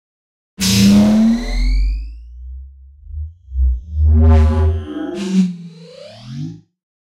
BLASTING, FLABBY, SPACE, FLY-BY. Outer world sound effect produced using the excellent 'KtGranulator' vst effect by Koen of smartelectronix.